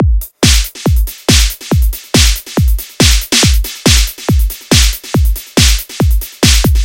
trance beat 140bpm
hard, house, cool, repeat, trance, guitar, loop, beat, 140bpm, piano, smooth